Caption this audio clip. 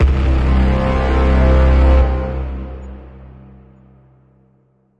2braaaam1 ir -12 growl
A collection of "BRAAAMs" I made the other day. No samples at all were used, it's all NI Kontakt stock Brass / NI Massive / Sonivox Orchestral Companion Strings stacked and run through various plugins. Most of the BRAAAMs are simply C notes (plus octaves).
rap, scifi, fanfare, suspense, heroic, brass, movie, battle, epic, arrival, orchestral, strings, mysterious, hollywood, trailer, braaam, soundtrack, cinematic, inception, dramatic, film, tension, hit